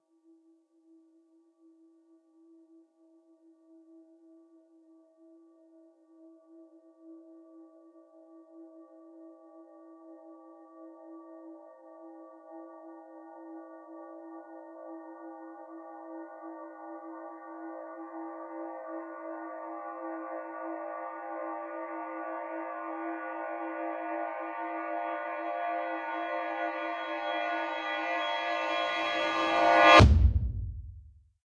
Clang Cinematic Reversed With Deep Kick
A transitional or climactic cinematic sound.
cinematic, climactic, trailer, transition